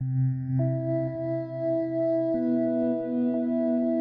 Some clips of irredescence
jeffrey, glitch, idm